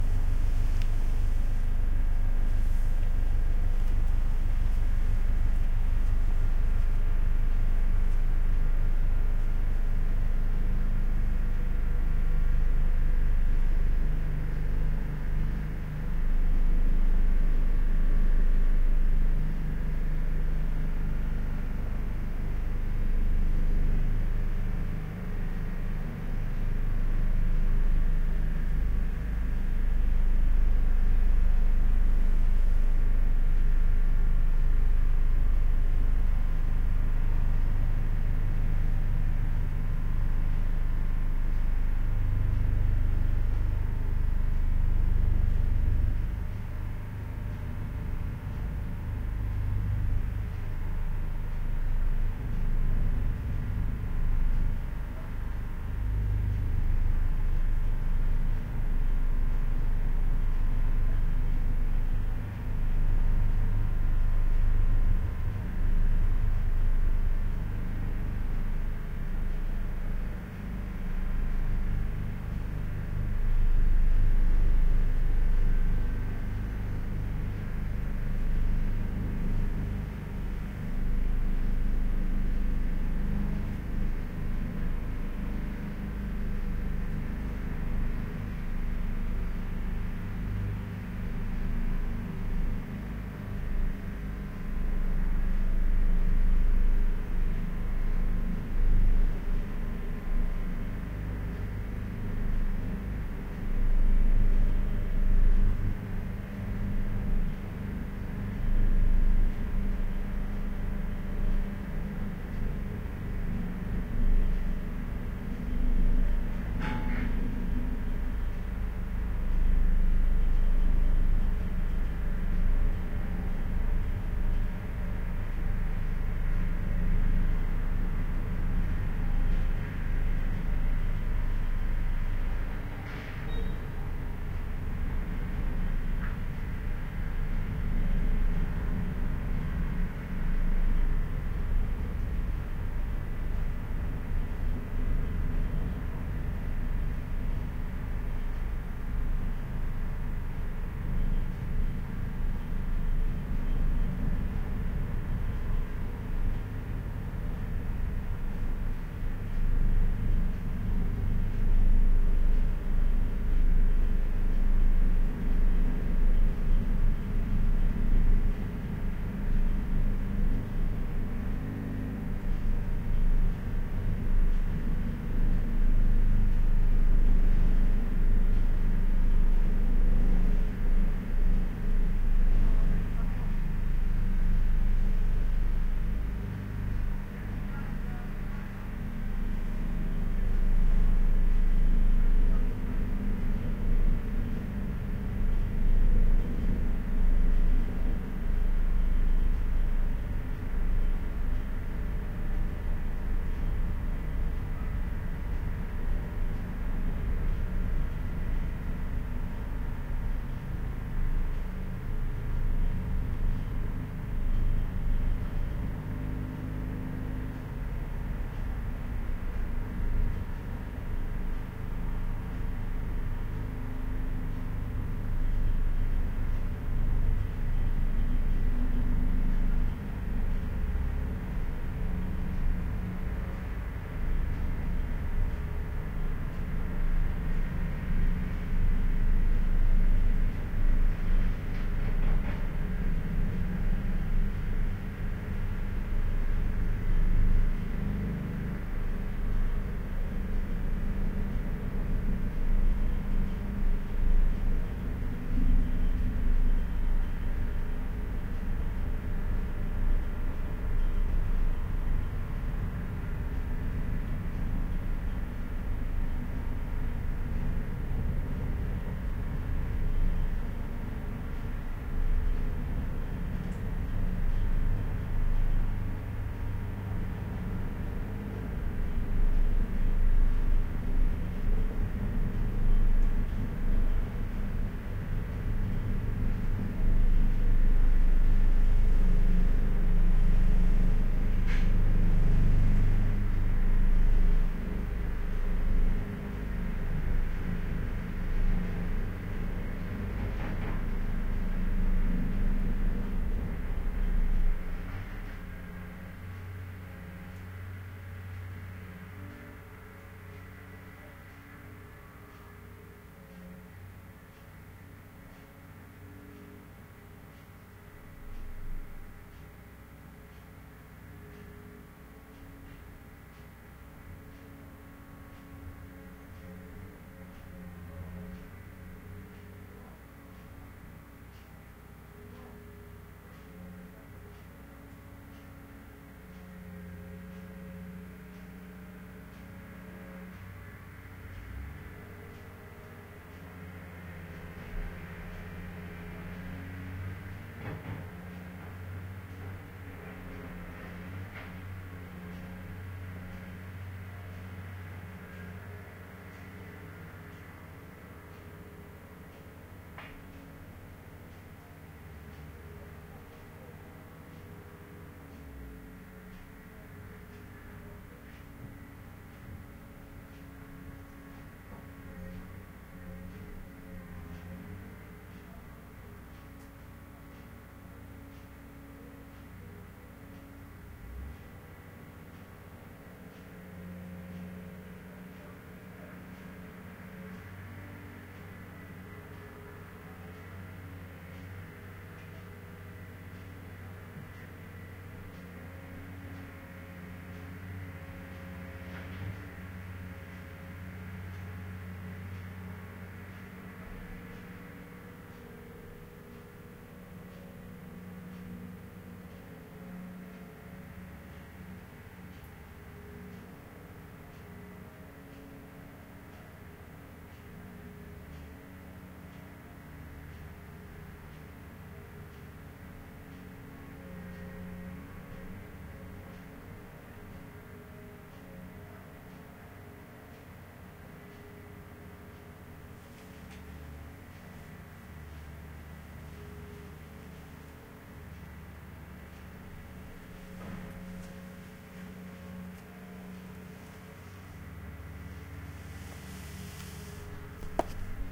011-Road works (from house)
Blumlein stereo (MKH 30), recorded with AETA 4Minx in november with a the door slightly opened; unedited
clock, indoor, low, motor, road, rumble, Works